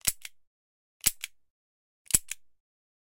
Weapon Revolver DryFire Mono
Revolver Trigger Sounds - Dryfire (x3).
Gear : Rode NT4.
clip, dryfire, foley, gun, h5, hammer, handgun, pistol, recording, revolver, shot, weapon, zoom